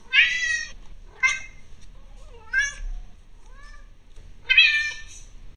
This is my cat miauing when she wants me to pet her :)